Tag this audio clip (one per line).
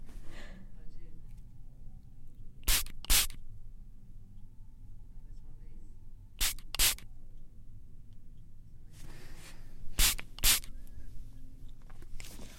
espirrar
perfume
sprey